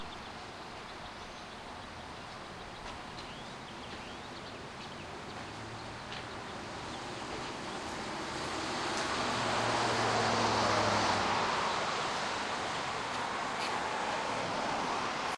southcarolina exit102santee windless
Getting gas next to Santee Resort Inn recorded with DS-40 and edited in Wavosaur, this one without the annoying wind noise that plagued the raw recordings.
bird, field-recording, gast-station, road-trip, south-carolina, summer, travel, vacation